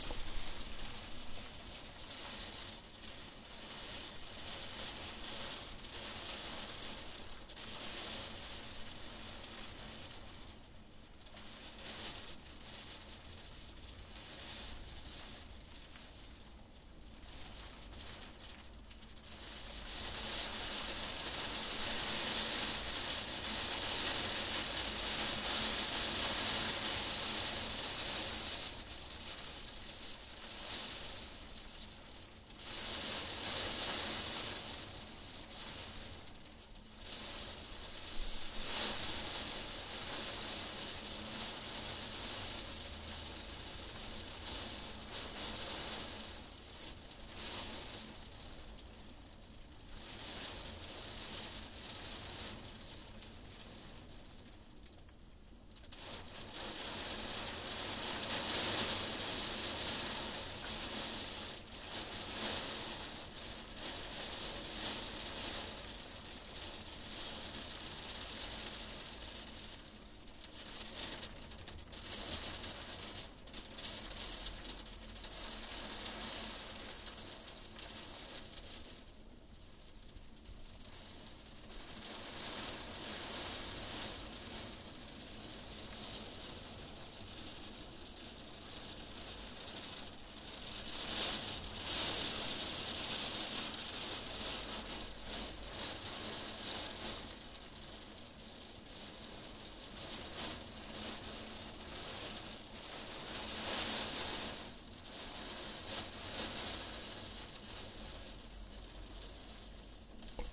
111229-000 hail storm on window pane
Heavy rain shower with hail during a winter thunderstorm hitting against our window pane. Zoom H4n
field-recording, hail, thunderstorm, wind, window-pane, winter